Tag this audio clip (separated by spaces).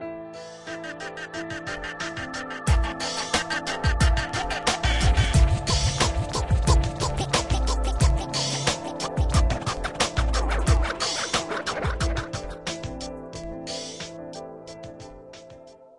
electro synth